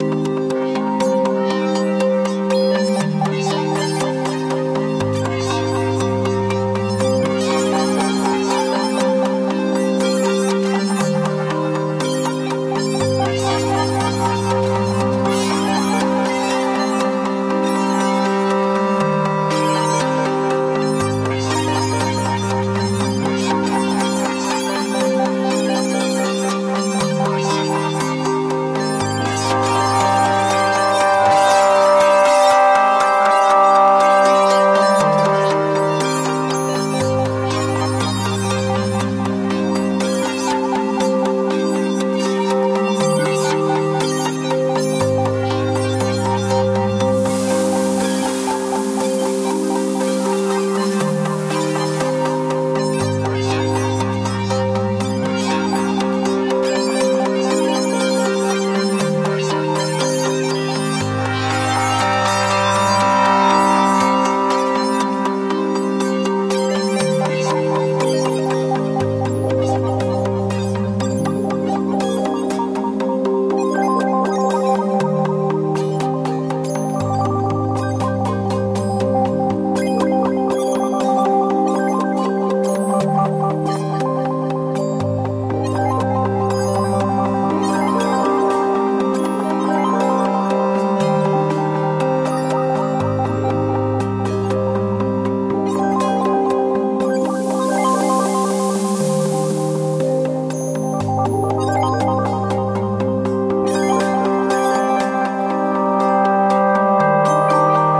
Very far east sounding synth made in ableton.